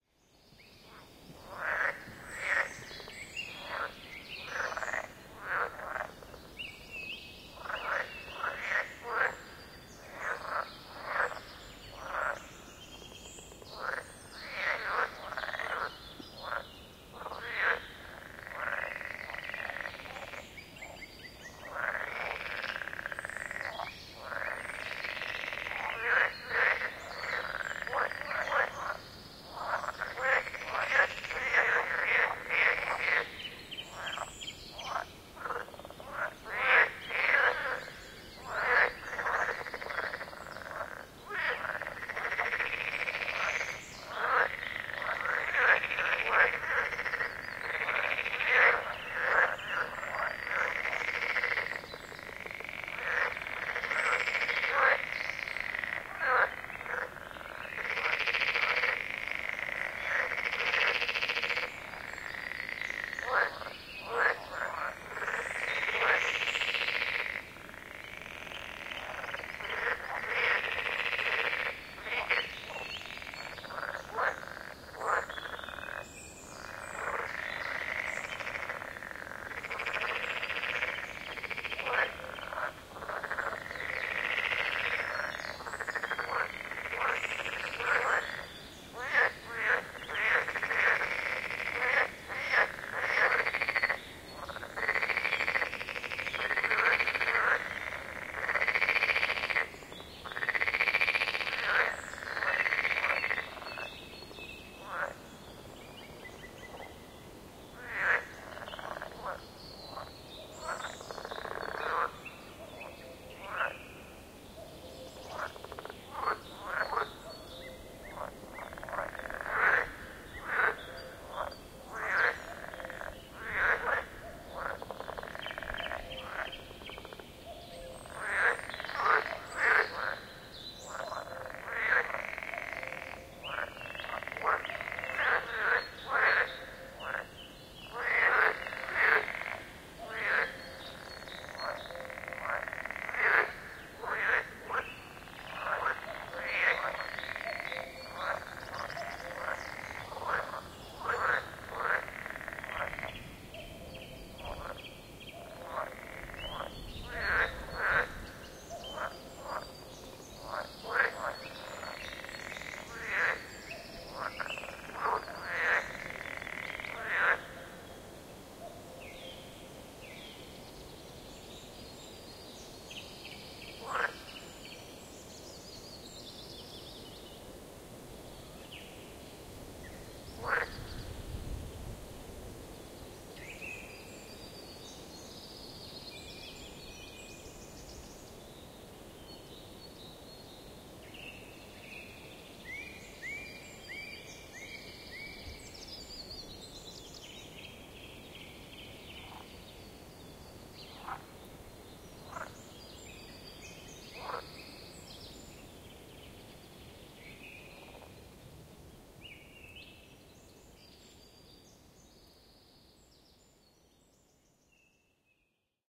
Sounds of frogs and birds in "Bagno Przecławskie" nature reserve in Poland.
Recorded with Zoom H2n (2CH mode).